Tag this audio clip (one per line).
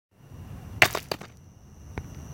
earth
field-recording
rock